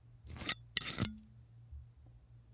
Cap screwing off of a bottle. glass, low pitch, resonant.